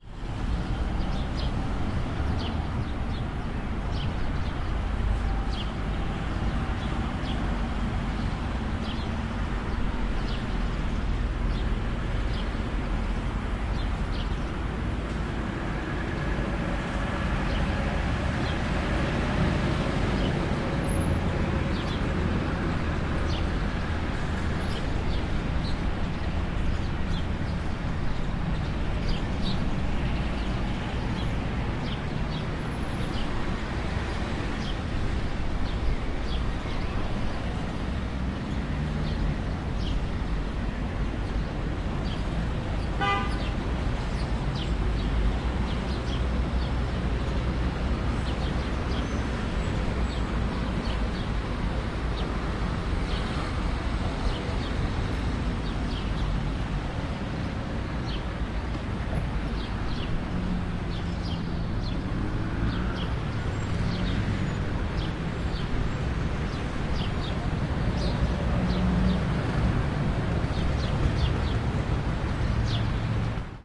0244 Roundabout Colón
Birds, traffic.
20120326
spain, traffic, caceres